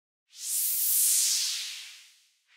Slow Airy Woosh - RicRob - NM 6
Woosh made with Noise Maker Synth
Edited in Cubase Pro 10
Ricardo Robles
Música & Sound FX
Airy, FX, High, Noise, Sound, Woosh